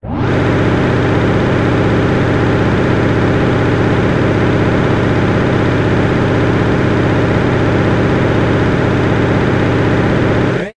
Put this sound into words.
granular ambience 6 jackhammer
Created entirely with granulab, simulation of a jackhammer.